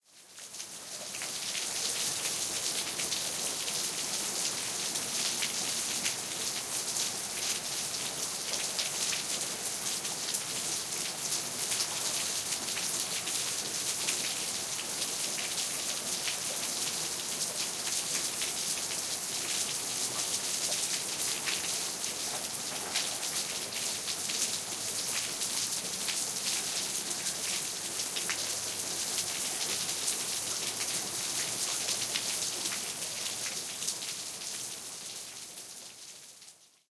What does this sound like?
A recording of rain falling on concrete.